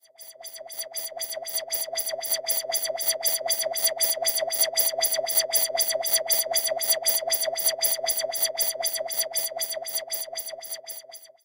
I took a square sound, of 15 seconds. Then I added a Wahwah and the effect to melt at opening and to melt in closure.
sound, square